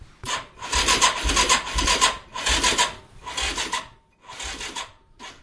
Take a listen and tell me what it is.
ATV Engine Turn 4
Buzz, electric, engine, Factory, high, Industrial, low, machine, Machinery, Mechanical, medium, motor, Rev